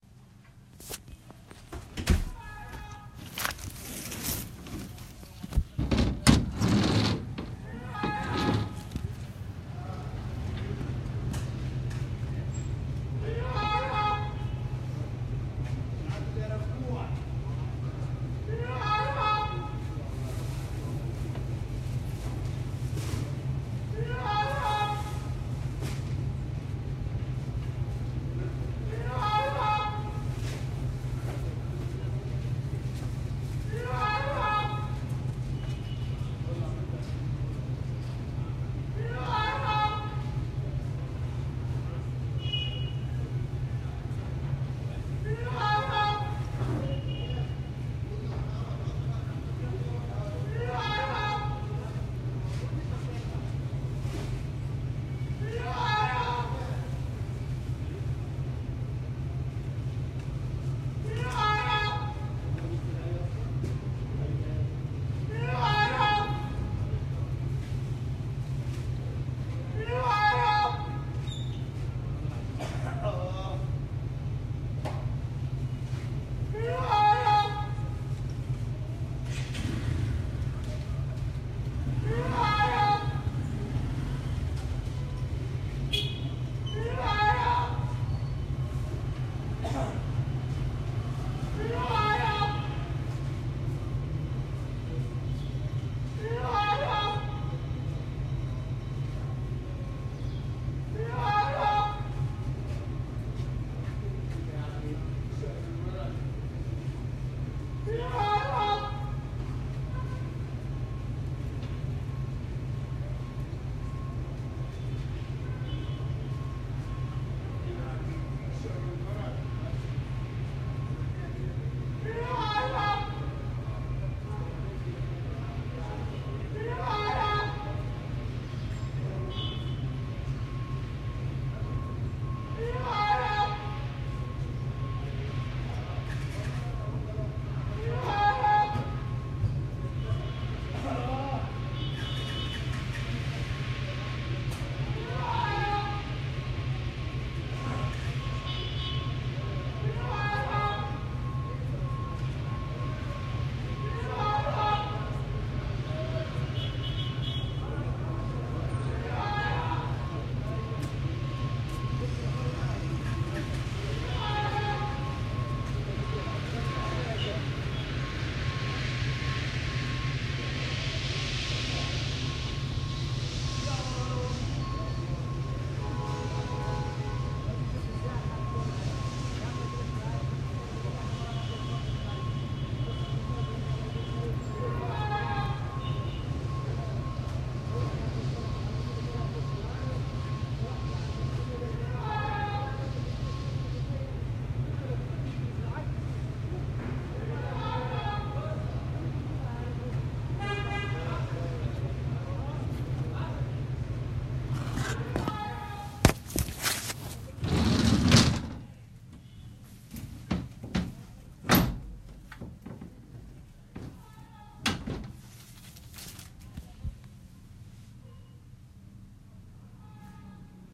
Man shouting in Giza, Egypt
A few minutes of a man shouting in Dokki, Egypt.
giza, cairo, spaces, egypt, public